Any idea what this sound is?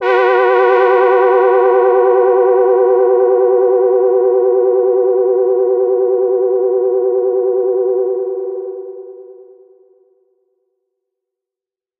ruimte trompet2
some sort of space brass lead pad thing